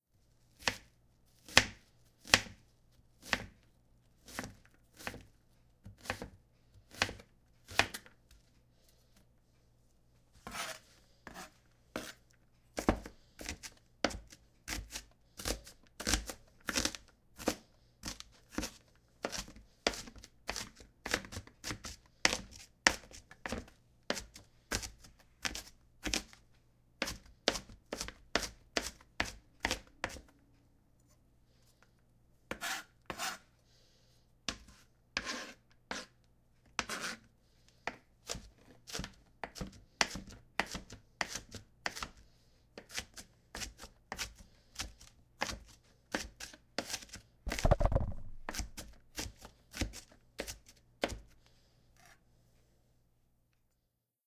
dicing an onion home April2012
I cook almost everyday and I love the crunch of dicing an onion. The recording has a bit of breathing noise and at one point I hit the recorder with my knife, but the bouncing sound it made was actually kind of pretty so I didn't clean it out. Recorded with a ZoomH2 for Dare12.
field-recording, dare-12, onion, repetition, dicing, crunch